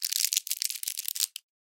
candy wrapper crinkle C
crinkling a plastic candy wrapper with fingers.
candy, crinkle, wrapper